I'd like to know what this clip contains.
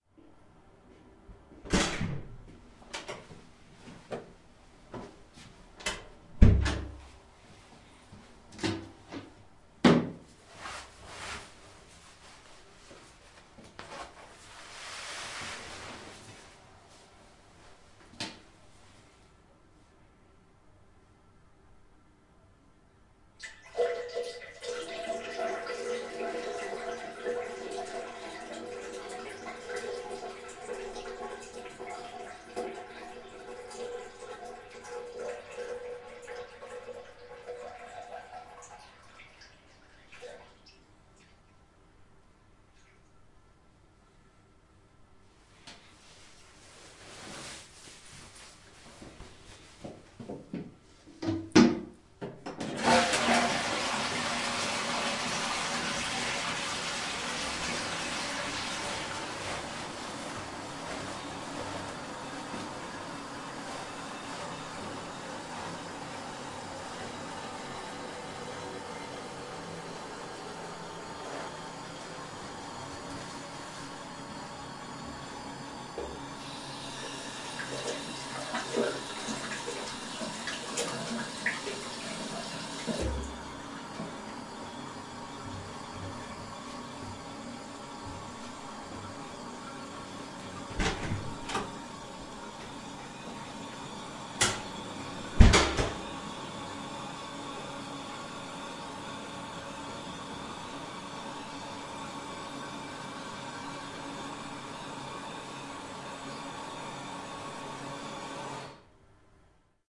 Mann auf kleiner Toilette
A guy, who visites a small toilet.
flushing, home, indoor, small, Toilet, trousers